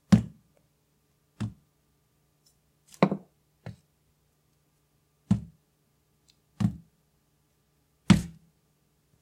Setting down a heavy jar on a coaster.